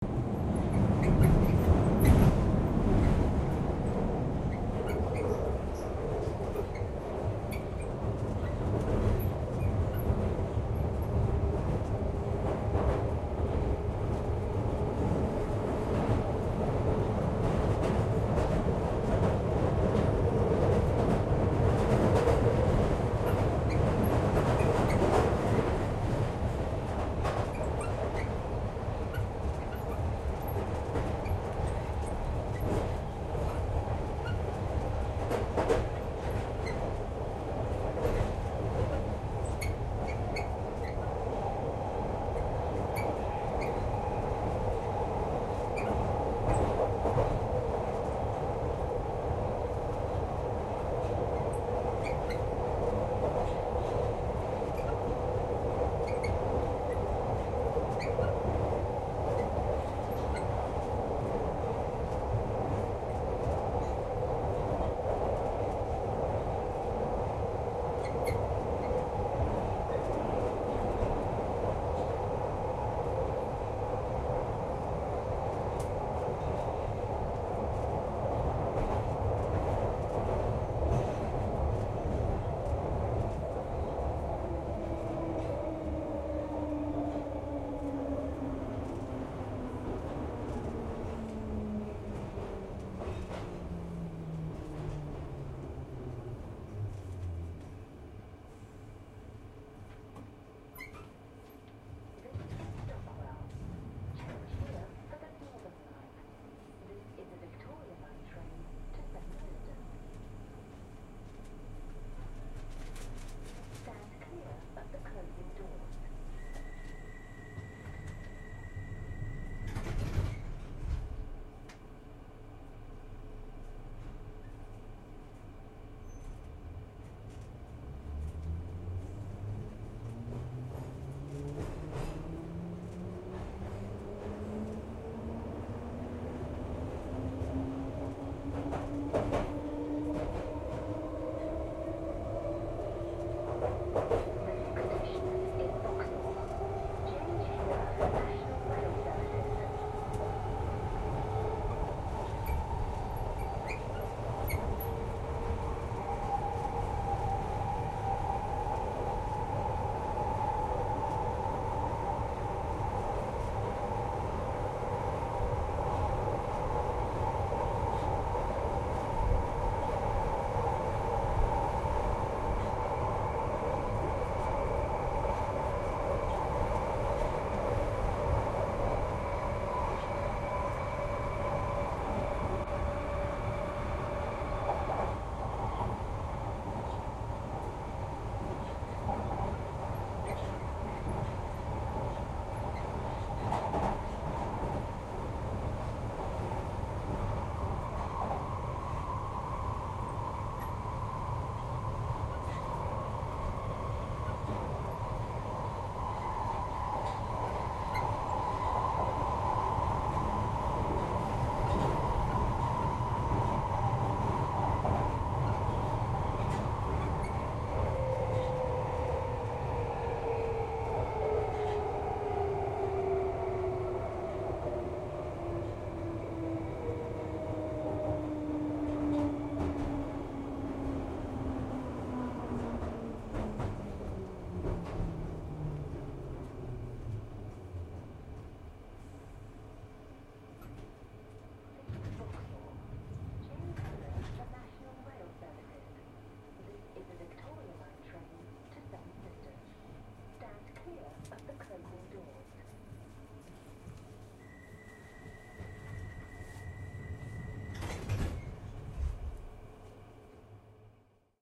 Tube-away from brixton
Travelling north on Victoria line. Quiet station announcements can be heard. Nearly empty carriage.
train, underground, tube, subway